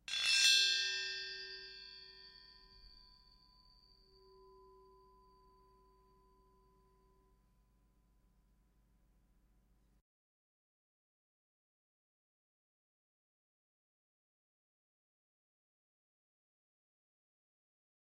Heatsink Large - 08 - Audio - Audio 08
Various samples of a large and small heatsink being hit. Some computer noise and appended silences (due to a batch export).